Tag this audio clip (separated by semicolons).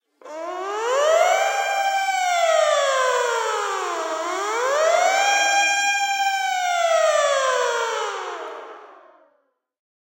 Foley; Car; Police